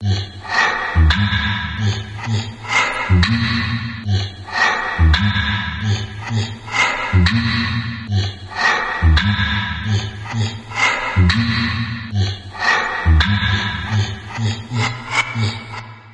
Escaping Time
dark, ghoul, phantom